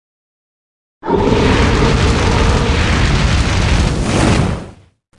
Fire-breathing dragon
A fire breathing dragon sound I created. Useful for toasting villagers and flambeing knights in melted armour.
toast, breath, flame, fire, dragon